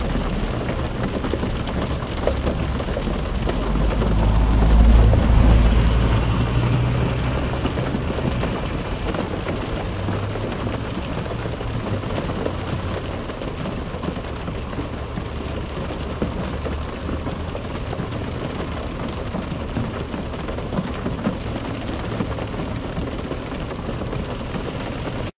rain on the roof of a car in winter
car, rain, roof